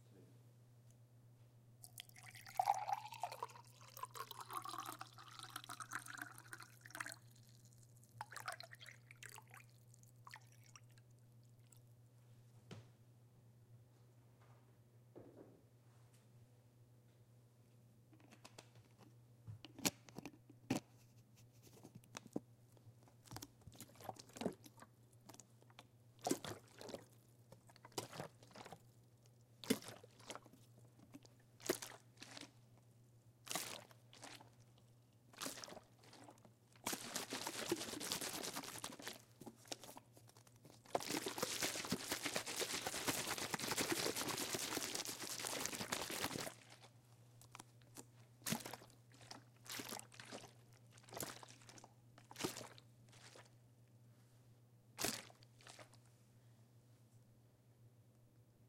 botella de agua 02
botella de agua - water bottle 2
2, agua, botella, bottle, de, pour, water